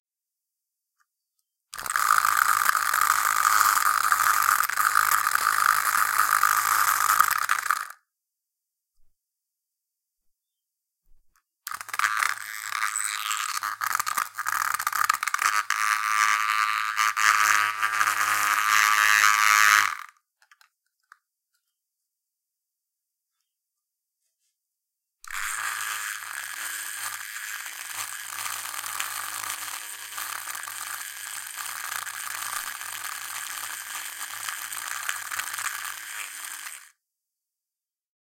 Vibrating a spray can's lid: Vibrated with an electric razor, rattling noises.
can, Lid, rattle, razor